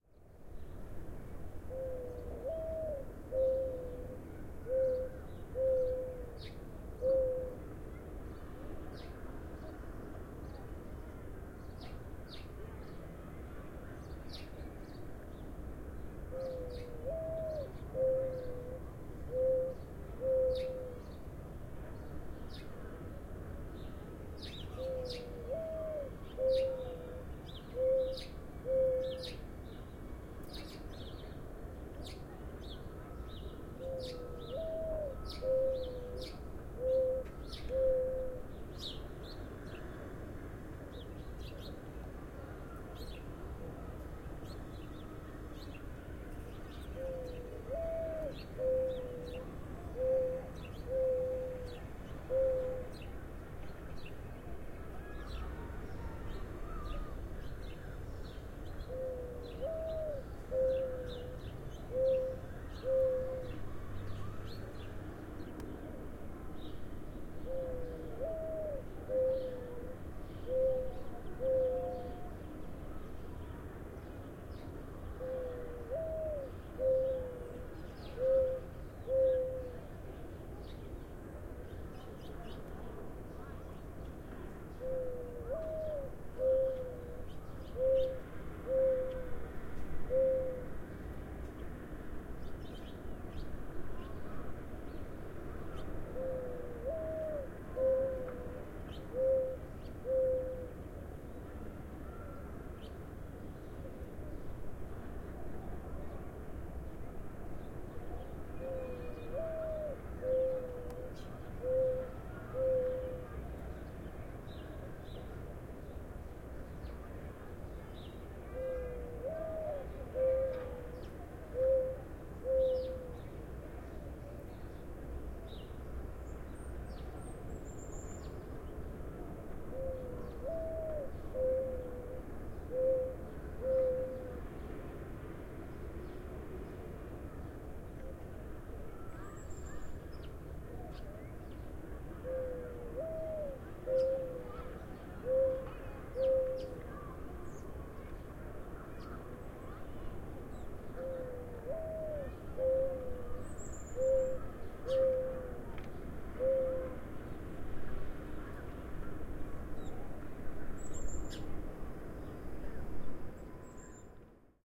210325 Mourning Dove, calls, urban busy world, roof, 77mel 10am
Morning Dove coos, urban, Toronto. Roof mounted CS-10EM mics.